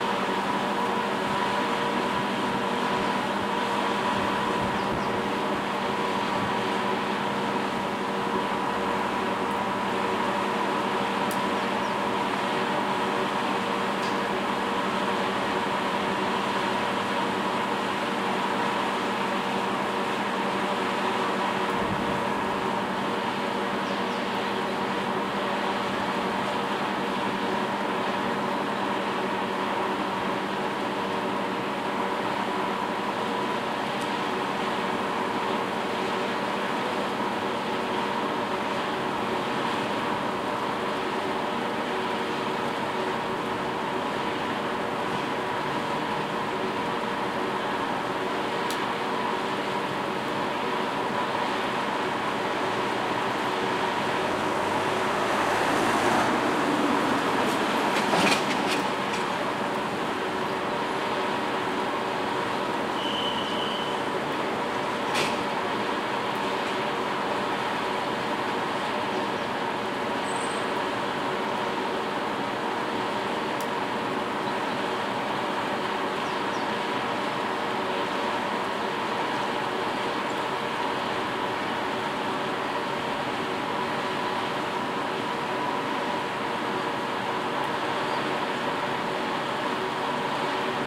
Noise of ventilation.
Recorded 2012-09-28 01:30 pm.
noise, Omsk, Russia, ventilation